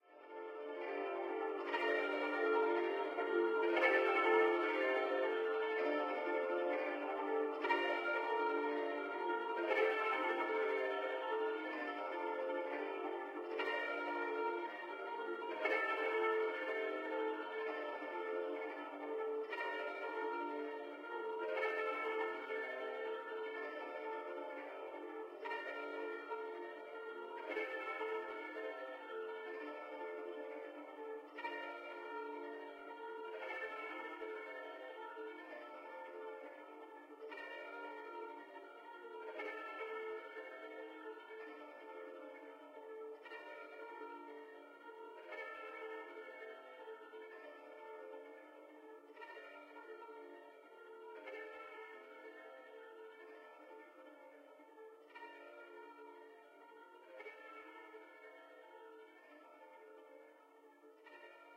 G minor noodling and delay on an electric guitar